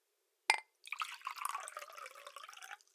Clink - Good Pour
These are various subtle drink mixing sounds including bottle clinking, swirling a drink, pouring a drink into a whiskey glass, ice cubes dropping into a glass. AT MKE 600 into a Zoom H6n. No edits, EQ, compression etc. There is some low-mid industrial noise somewhere around 300hz. Purists might want to high-pass that out.
alcohol, bar, cocktail, drink, glass, ice, ice-cube